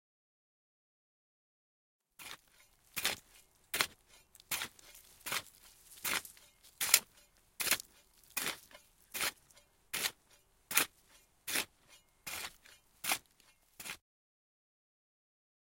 Hoe-work
hoeing the earth with a metal hoe
close perspective
CZ, Czech, Garden, Panska